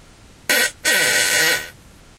fart poot gas flatulence flatulation explosion noise